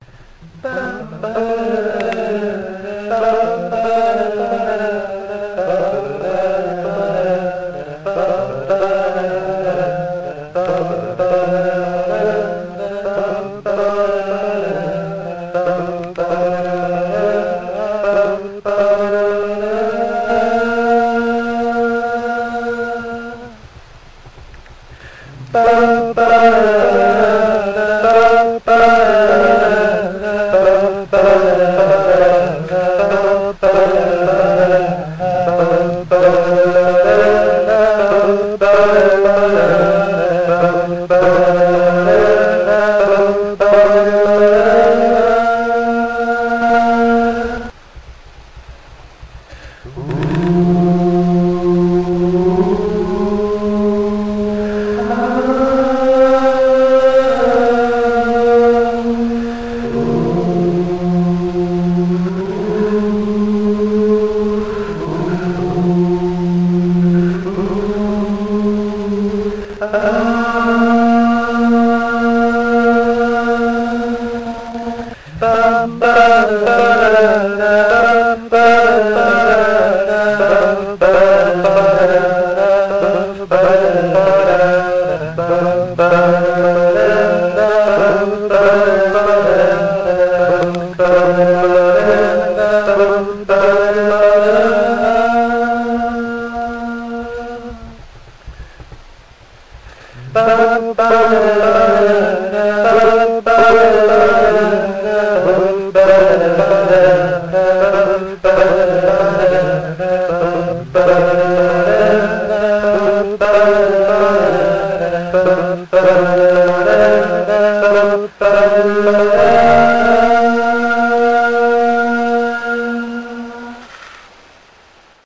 04 - Test Harmonising 7 (extended ending)
Harmonies I had done for a song, but they didn't come out quite right.
They are in the key of Eb major, but I'm sure that you can sort that
out using software.
choral, harmonies, singing, vocal